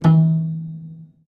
Upright Piano Pizz E Dull 2
A cool sound I made messing with an out-of-tune upright piano. The tuning is approximately "E."
Piano, freq, FX, Muted, Dull